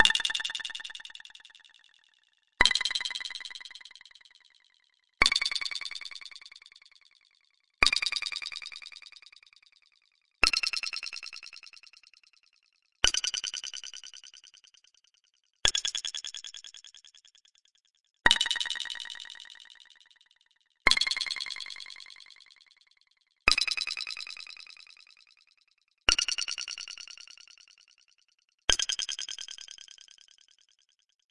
microphone + VST plugins
effect, fx, sound, sfx